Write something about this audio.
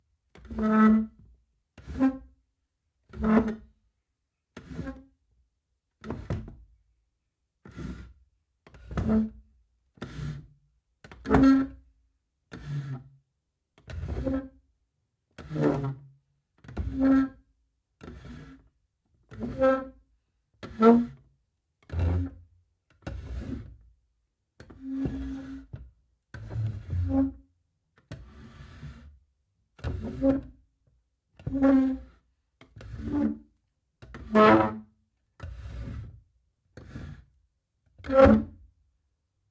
Pushing Pulling Chair Table Wooden Furniture Across Floor Squeak Pack
Chair, Concrete, Dining-Room, Domestic, Foley, Furniture, Hard, House, Kitchen, Lounge, Moving, Pulling, Push, Pushing, Slide, Squeak, Tug, Wooden, Wooden-Chair, Wooden-Table